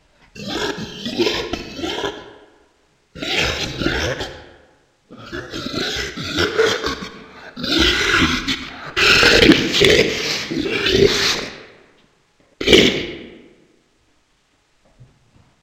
monster sound
This is a (maybe scary) Sound of a (maybe scary) Monster.Have fun with this.
By the way: from now every week a new Sound comes out!
Laugh, Scary, Alien, Horror, Drone, Spooky, Scream, Original, Dark, Creepy, Evil, Halloween, Sound, Monster